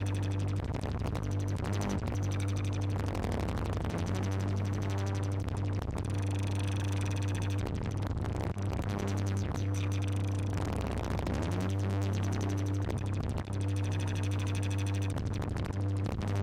Bubbling Drone
A chaotic bubbly drone, feeding in on itself
Evolving filters morphing a distorted vco
This is lfo modulating another, it mashes up and creates irregular chaotic patterns
This sound is part of the Intercosmic Textures pack
Sounds and profile created and managed by Anon